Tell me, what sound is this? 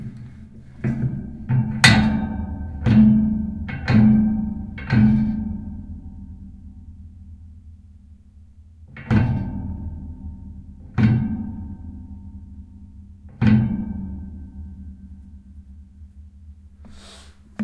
Metallic bass perc
Using a bench vise in a smithy getting bass heavy ambient sounds.
ambient, R, smithy, Roland, ntg3, bass, R26, industrial, vise, de